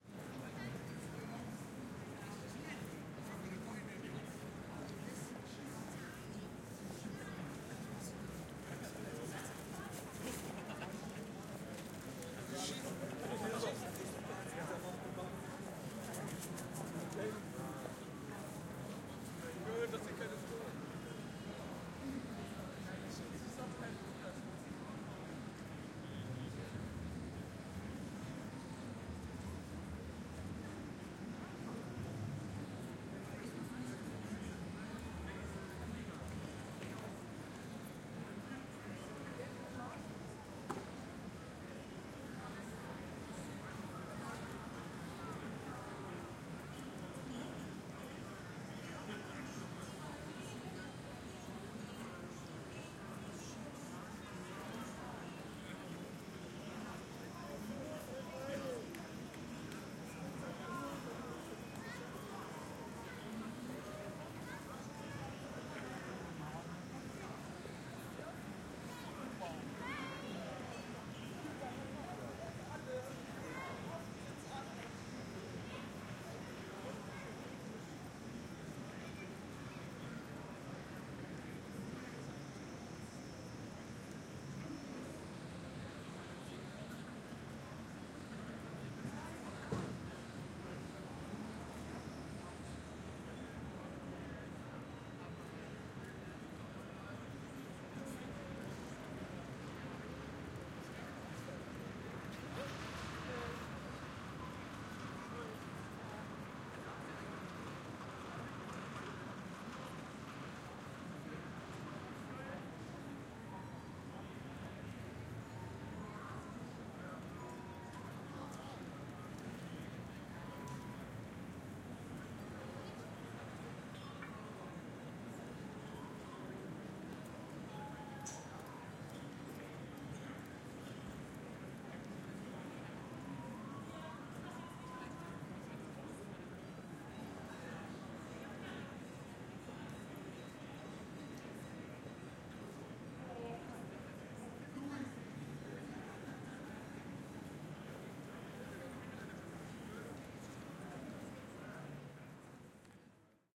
Christmas Market Hamburg - People passing and talking
Christmas market in Hamburg with lots of people visiting. Lot of talking, sounds of people passing by, gastronomy and other "service" sounds.
Recorded with a baffled pair of MKE2 on a Tascam HD-P2.